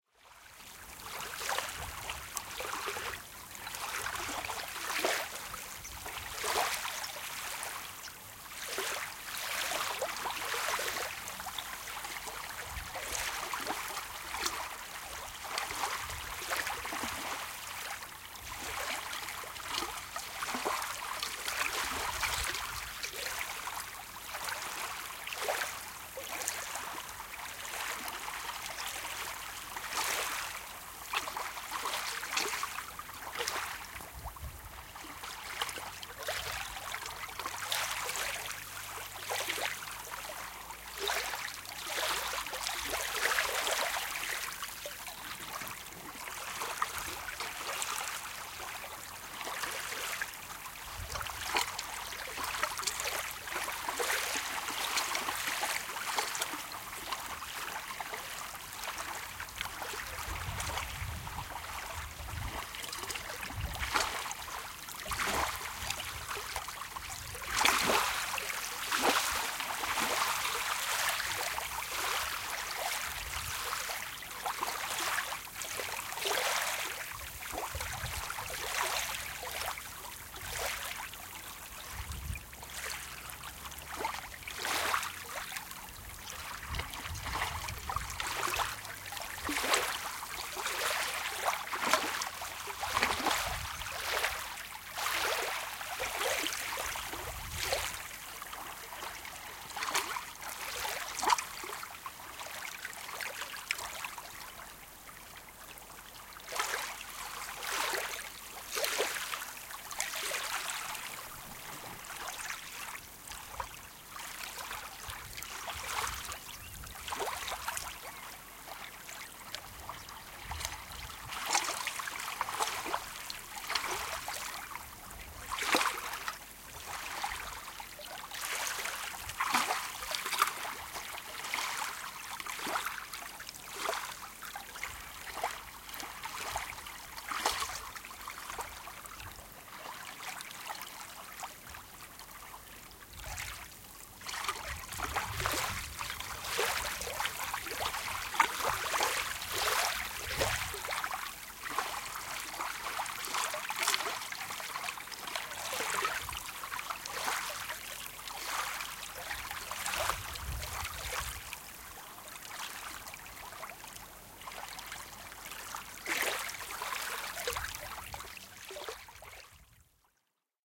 Pienet aallot rantaan, liplatus / Small waves on a sandy beach, nearby lap of waves
Pienet laineet lyövät hiekkarantaan, liplatusta. Lähiääni.
Paikka/Place: Norja / Norway / Olderdalen
Aika/Date: 10.05.1979
Field-Recording, Finland, Finnish-Broadcasting-Company, Lapping, Luonto, Nature, Ranta, Shore, Soundfx, Suomi, Tehosteet, Vesi, Water, Yle, Yleisradio